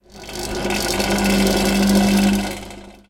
Dragging a bench
field-recording, metallic, reverberation